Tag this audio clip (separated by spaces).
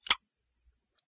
mouth click clack plop pop small tongue